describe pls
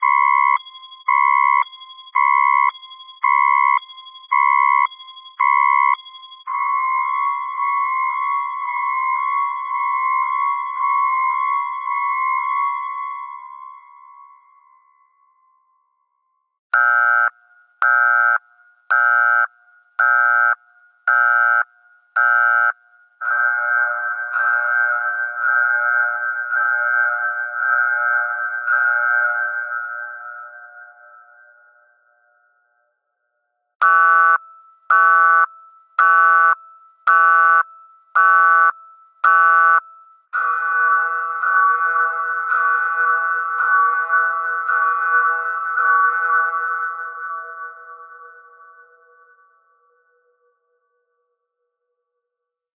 Three facility hazard alarms/Tres alarmas de peligro en instalaciones
Three different alarms, created from scratch with a Roland JD-Xi + Protools + Roland Quad-Capture.